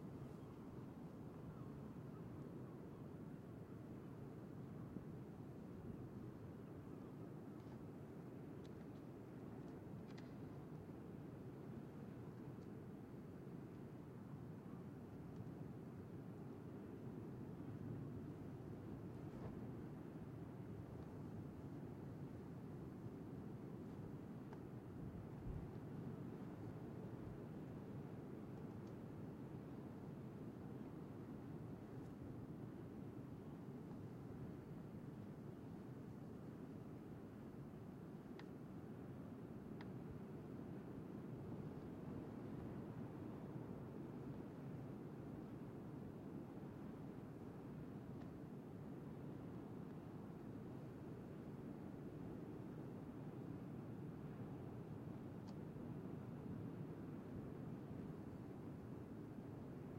LAXE LIGHTHOUSE ORTF
Short recordings made in an emblematic stretch of Galician coastline located in the province of A Coruña (Spain):The Coast of Dead
lighthouse, sea, ocean